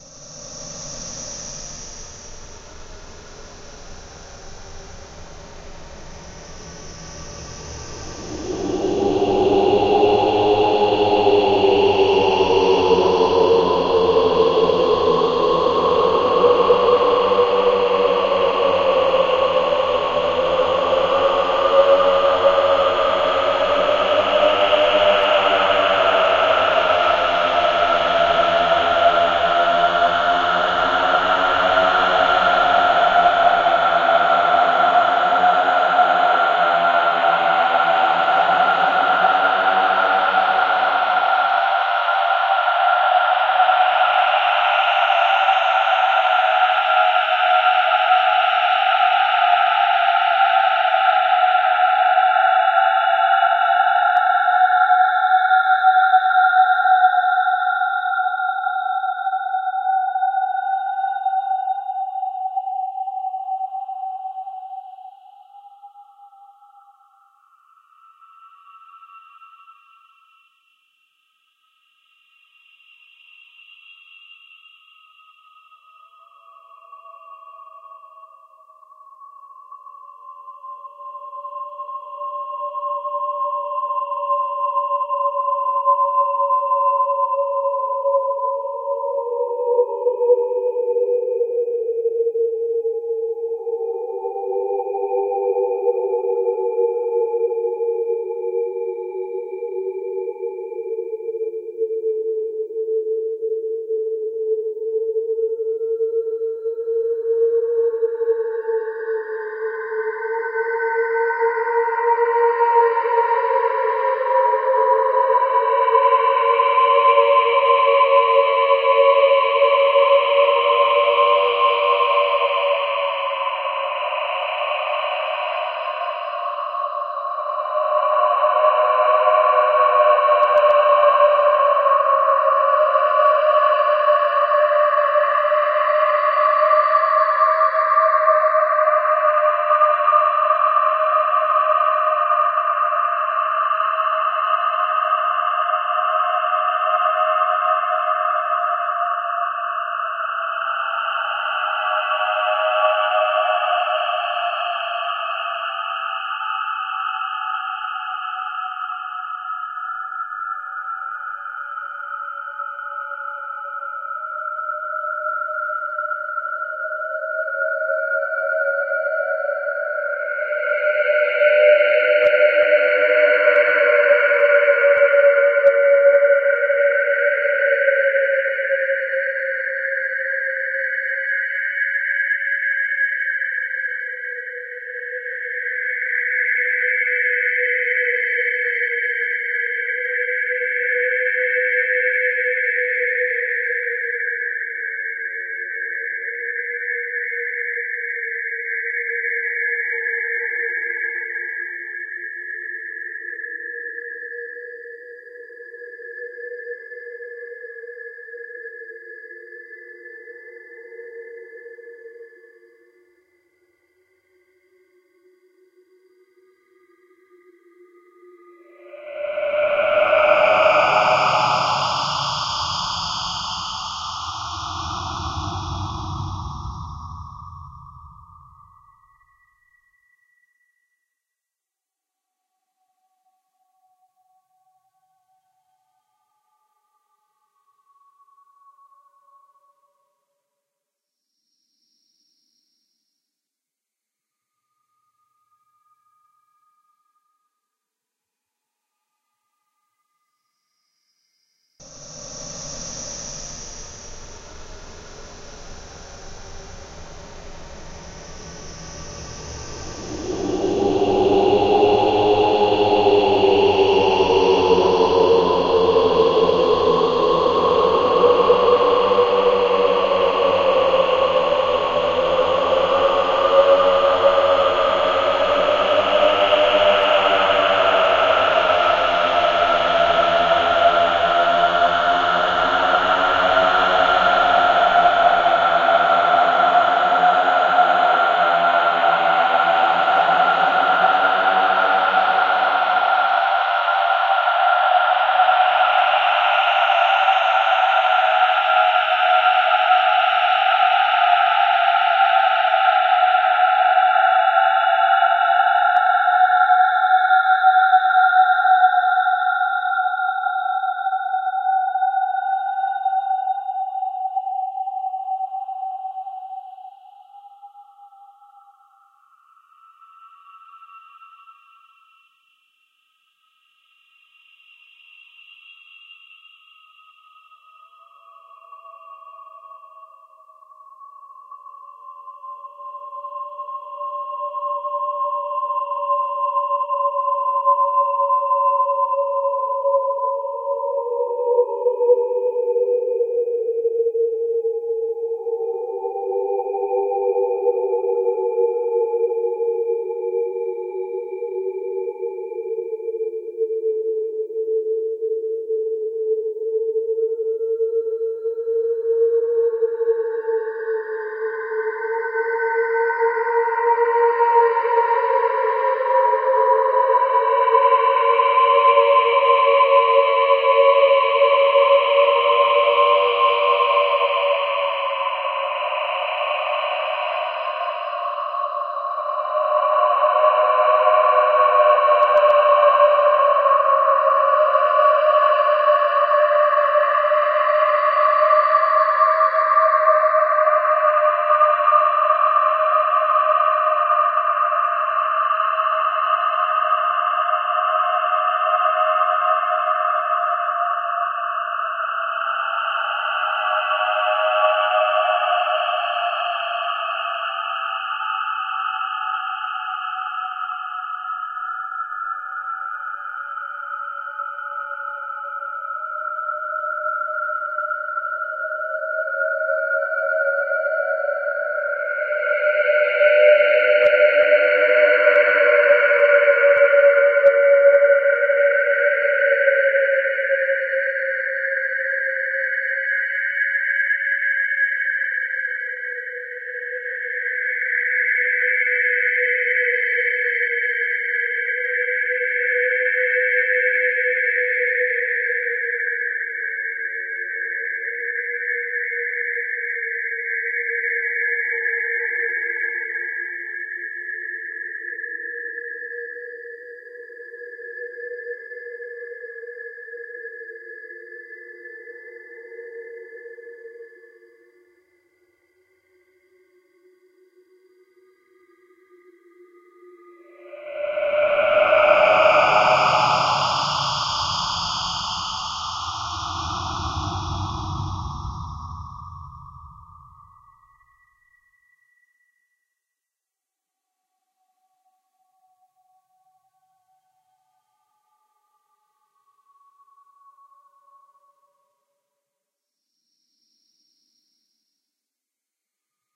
Scary audio
scary noises for anyone making scary things.
Excellent for haunted houses
drama, spooky, bogey, background-sound, right, phantom, horror, free, horrer, suspense, no, devil, anxious, scary, terrifying, noise, dramatic, terror, death, ghost, weird, open, creepy, demon, Gothic, thrill, nightmare, copy, haunted